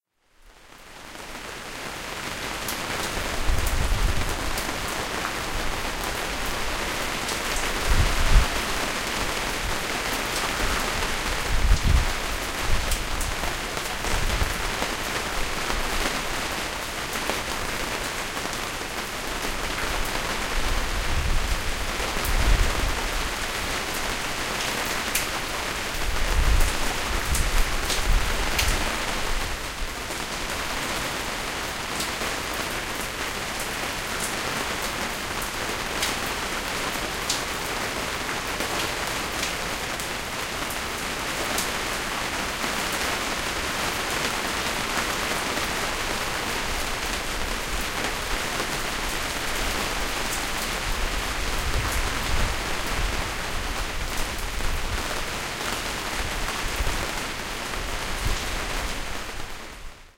rain on awning
Sharp rain sound on canvas awning over concrete stairwell, with some low rumbles from wind flapping the canvas. Recorded with microphones tucked behind ears for fairly good binaural effect.
binaural, rain, stereo